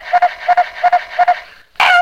Flowers Like to Scream 17
not-art, screaming, vocal, noise, psycho, yelling, very-embarrassing-recordings, stupid